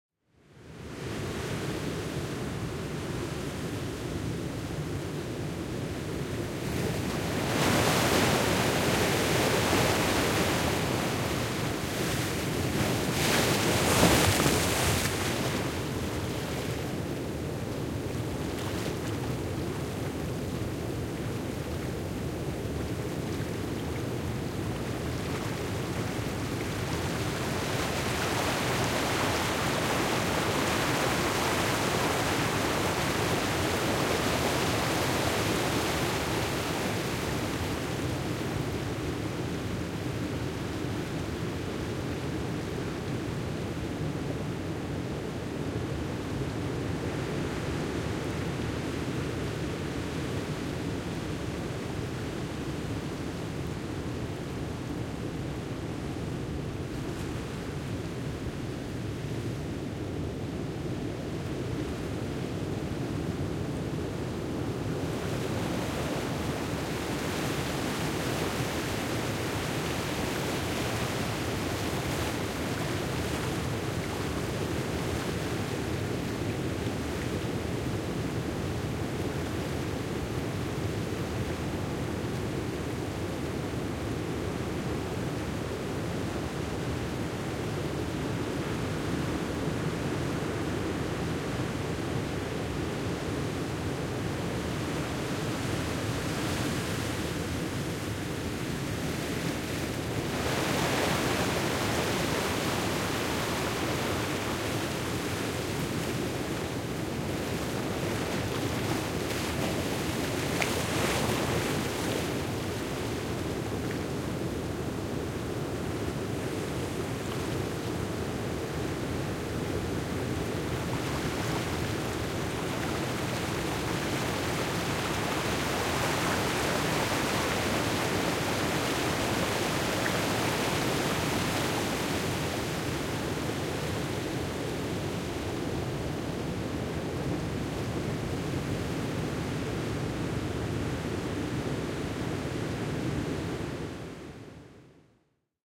arcadia beach 2012-02-23
I went to the Oregon coast to record some ocean sounds but the weather didn't cooperate with a lot of wind, rain, and constant waves so I only managed this one recording of waves hitting some rocks and receding. The first big splash actually hit the mics and myself.
Recorded with AT4021 mics into a modified Marantz PMD661. Some fades and slight EQ to reduce the background waves done with Reason.
ocean, splash, oregon, pacific, water, field-recording, waves, geotagged, outside, ambient